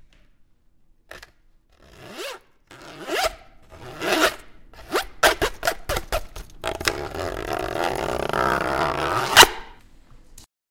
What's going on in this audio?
Forbes Project 1 1#15
took a pen and swiped it against the foil blade.